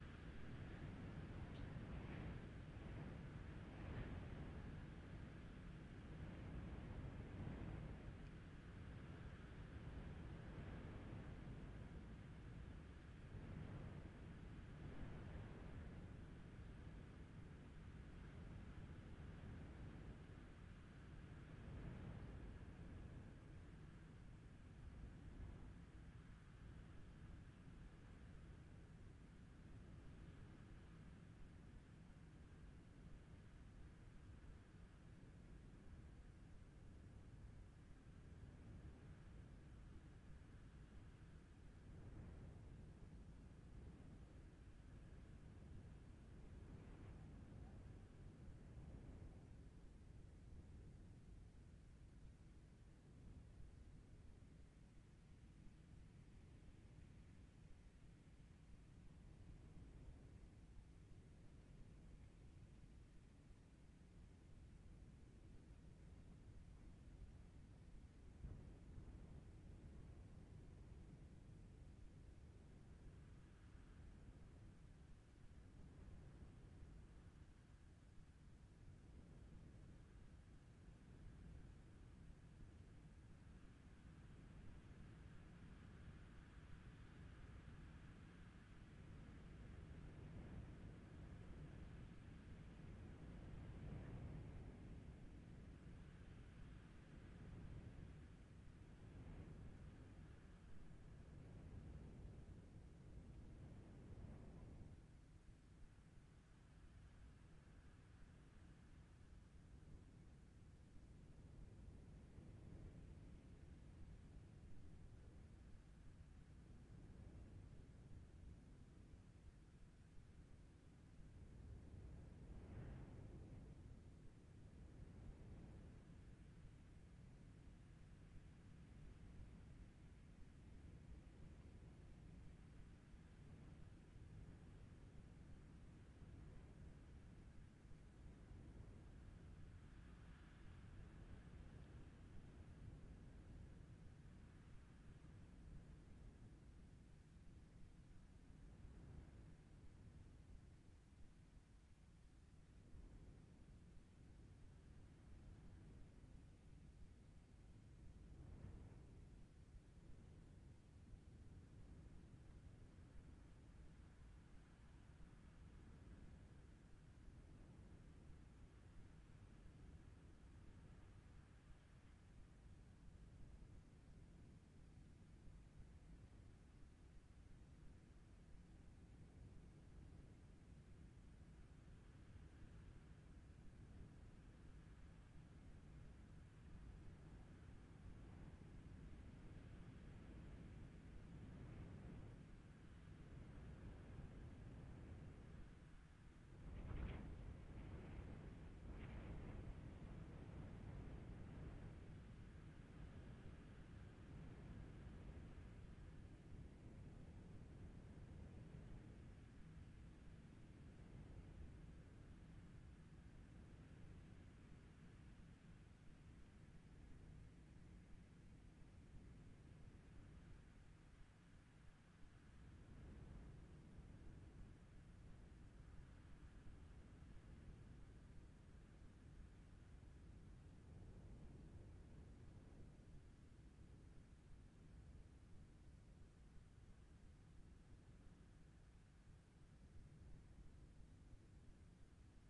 Wind(inside)
Wind in interior.
interior, nature, wind